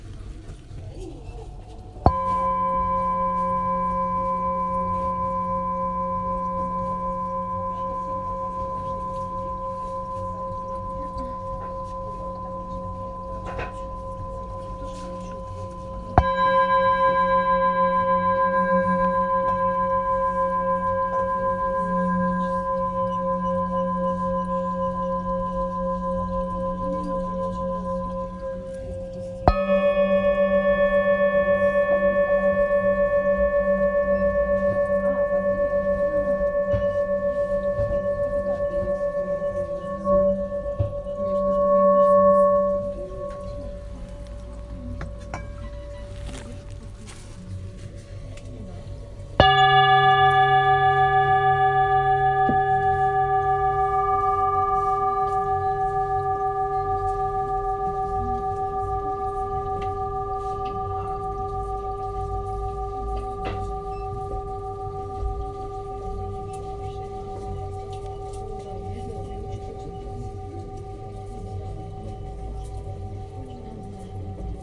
bowl drone 08.03.2018 19.02
another sound of the tibetan bowl
bowl, bowls, drone, singin, soundscape, tibetan